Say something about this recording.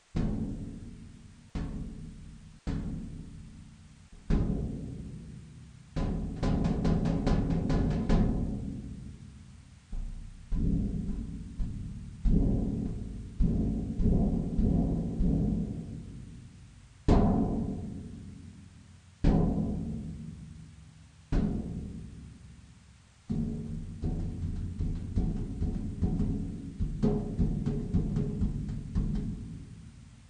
Tapping metal cake tin (slow)
Tapping a metal cake tin with finger
metal, cake, impact, tapping, tin